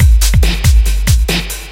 140 Stezzer break1
Download and loop.
140, beat, bmp, break, breakbeat, club, dance, hit, industrial, noise, phat, sample, sound, Stezzer, techno, trash